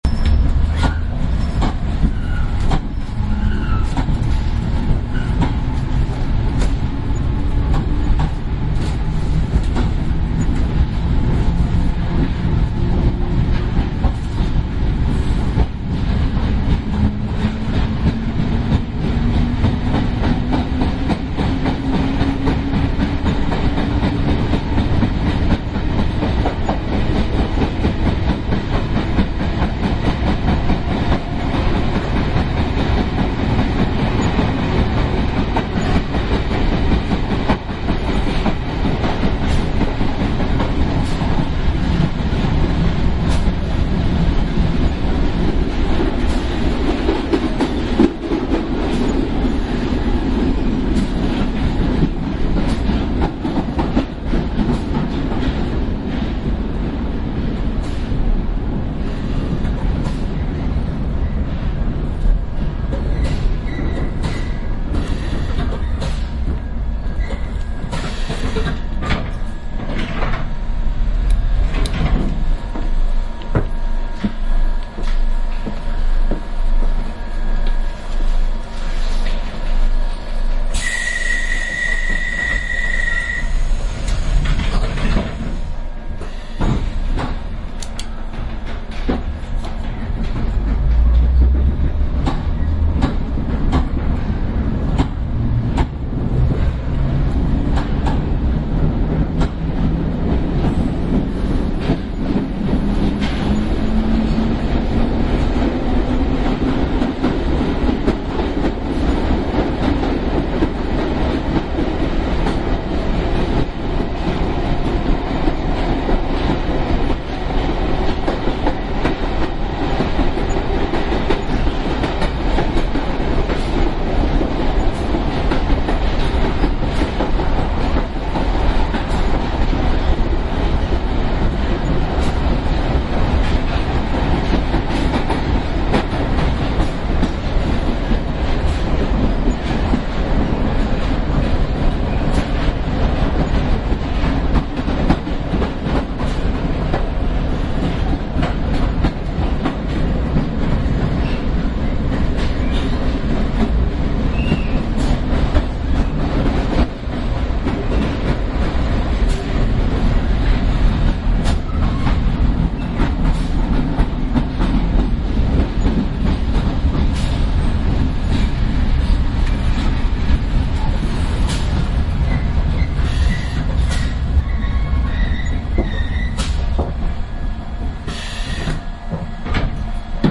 London Underground: Metropolitan line ambience
Sound of the Metropolitan line on the London Underground 'Tube' system. Recorded with binaural microphones on the train.
announcement, arrival, binaural, departing, departure, england, field-recording, headphones, london, london-underground, metro, platform, rail, railway, railway-station, station, subway, train, trains, train-station, transport, tube, underground